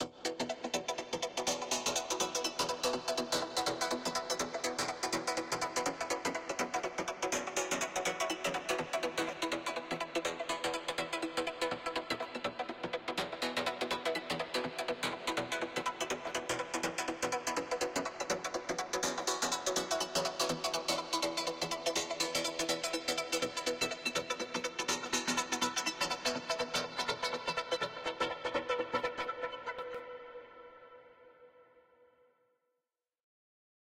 A guitar-like synth "arpeggiated" sequence. In a wide space.
Part 2 : medium.
Saturation, Wide, Arpeggio, Strings, Tape, Synth, Space, Plucked